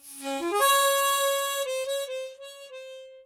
A chromatic harmonica recorded in mono with my AKG C214 on my stairs.